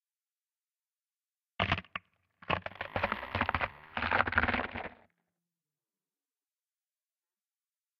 Sampler Tree Falling
created by crunching crisps in my mouth and slowing it down with door creak added